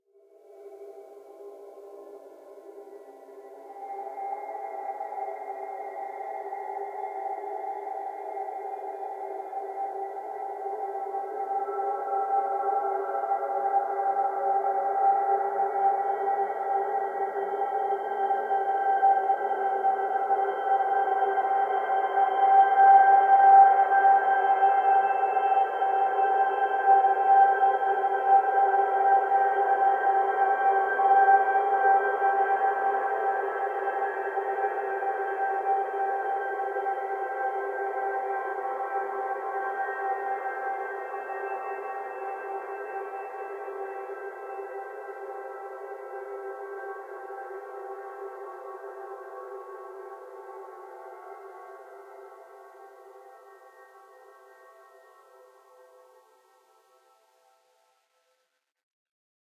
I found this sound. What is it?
Fantasy Landscape
A disturbing, hypnotic sonic landscape from outer space. Sample generated via computer synthesis.
terror, ambient, scifi, drone, landscape, scary